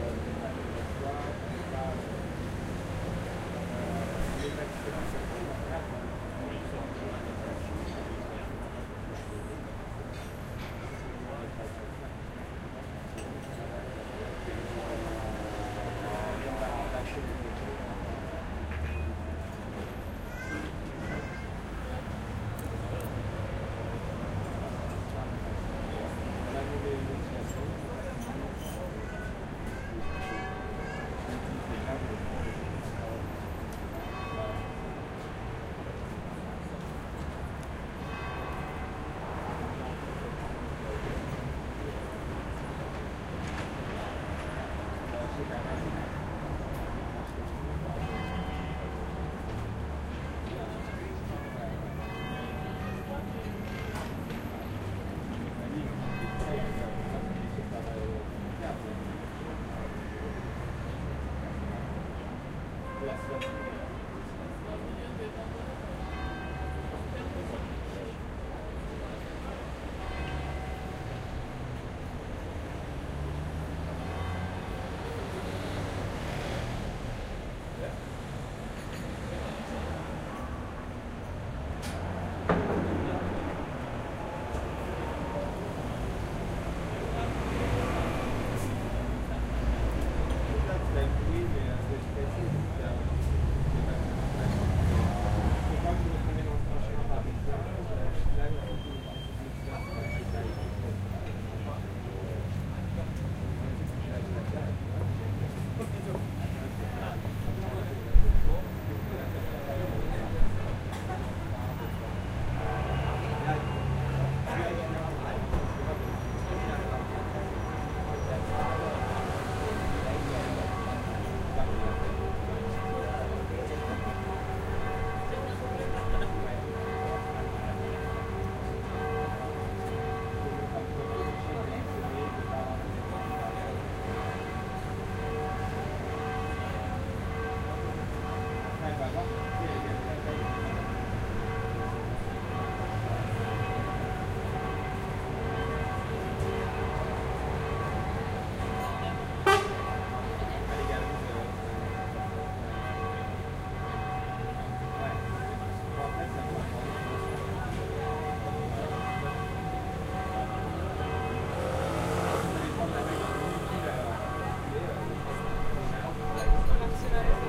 paris cafe ambient with bells short
some paris cafe ambience with bells recorded on terrace with zoom H4n. August 2011
french, pariscafechatstreeturban, summerfield-recordingchurch-bells